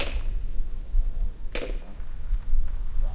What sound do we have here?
2 coups de marteau a mettre en boucle